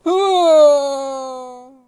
Male Fall Death 01
Recorded by mouth